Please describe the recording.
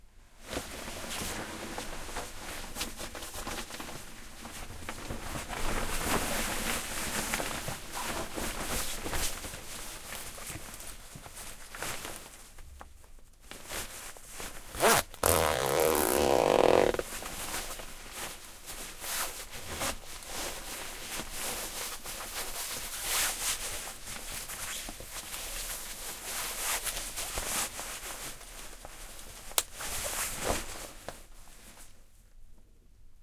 noises from a heavy-duty jacket being put on: nylon fabric rubbing, velcro, zipper and clip. Shure WL183, Fel preamp, Edirol R09 recorder